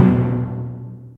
Drum Synth
Sound created from electric guitar sample. Edited in Acid Pro 7. Soundforge 8.
synthesized, bassdrum, bass-drum, drums, kick, percussion, drum